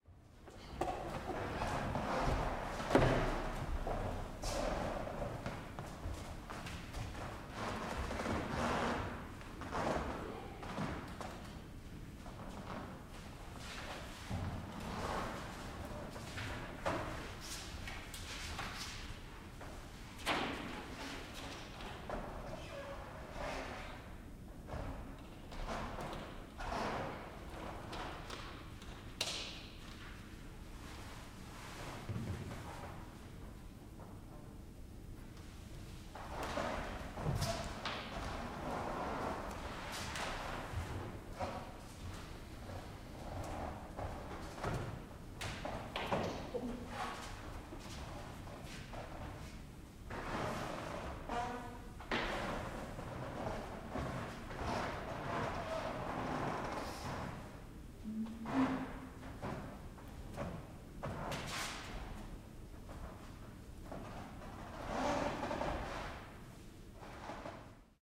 Movements before theater
Ambiance of preparatory movements before a theater rehearsal. 3 people on a creaky linoleum floor, walking. Natural reverb of this quite large yoga room.
ambiance, ambience, background, field-recording, footsteps, movements, noise, people, rehearsal, repetition, theater, walking, yoga